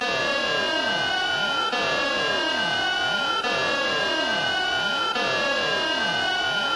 TB-303 caution 02
maked TB-303 clone.
techno, button, electoric